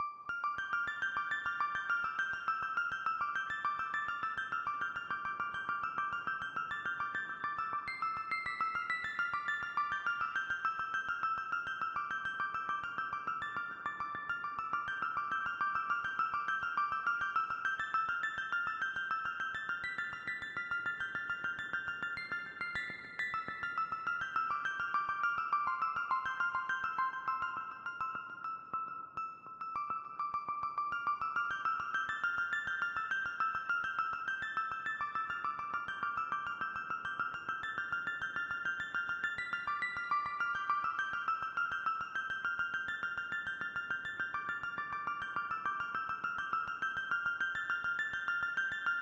Keys Piano Echo 102bpm